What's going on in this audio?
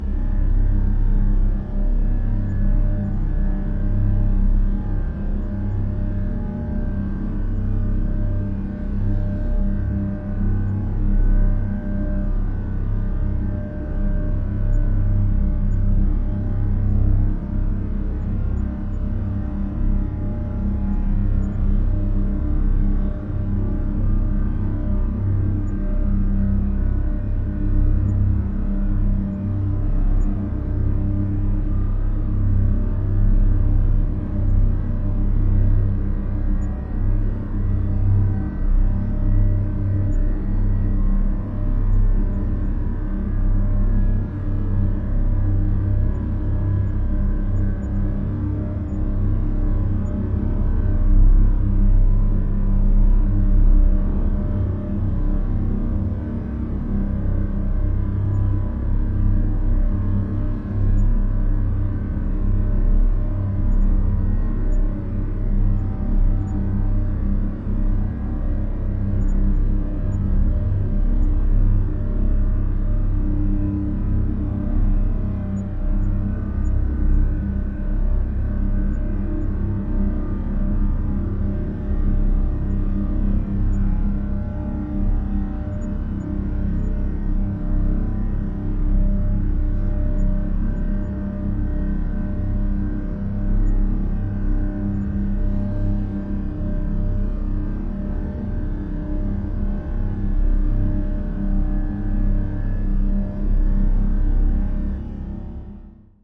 Dark and creepy sound design. fifth step of process of the bus sample on Ableton. Recorded the two previous sample playing simultaneously, so one normal and one reversed and re-processed, to have a more powerfull sound.